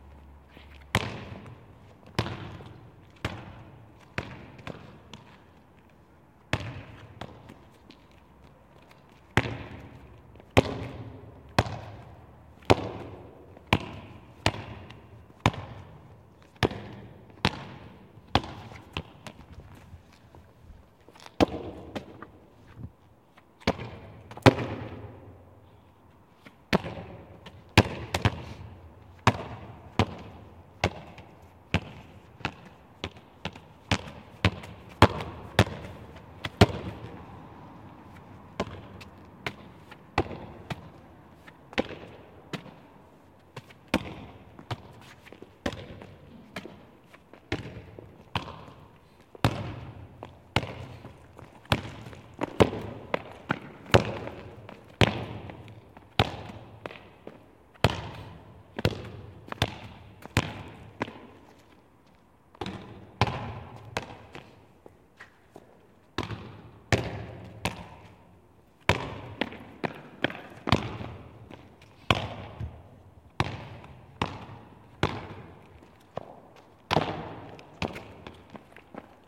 Basket ball on a concrete floor
Sounds recorded from a prision.
ball, basket, basketball, bounce, concrete, floor, play, prison